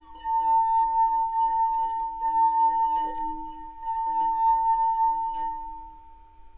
Tono Corto Agudo
bohemia glass glasses wine flute violin jangle tinkle clank cling clang clink chink ring
clink, cling, glasses, clank, wine, ring, jangle, tinkle, flute, bohemia, clang, glass, chink, violin